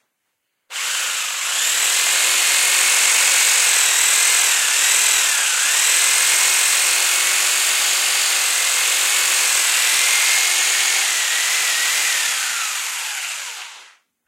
noise of a fairly old electric jigsaw of the brand Bosch / ruido de una sierra de calar vieja marca Bosch
machine, motor